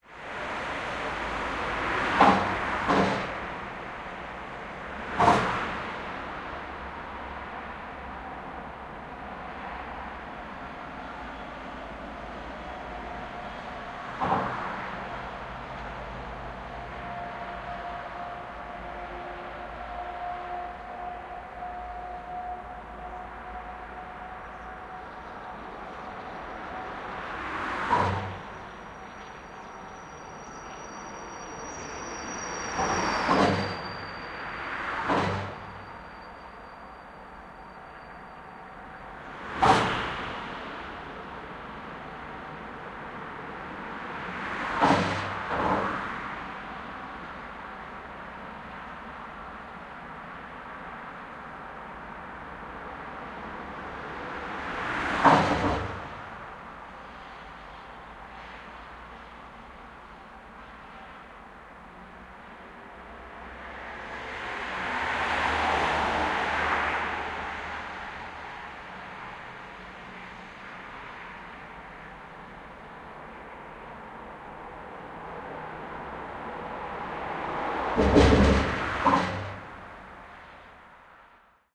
18092014 s3 under flyover 002
Fieldrecording made during field pilot reseach (Moving modernization
project conducted in the Department of Ethnology and Cultural
Anthropology at Adam Mickiewicz University in Poznan by Agata Stanisz and Waldemar Kuligowski). Under the S3 flyover in Świebodzin (on the crossroad with the national road no. 92).
car, lubusz, street, noise, fieldrecording, wiebodzin, traffic, road, flyover, poland